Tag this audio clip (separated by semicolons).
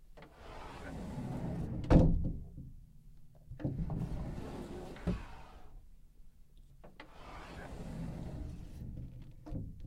Patio; field; recording; Metal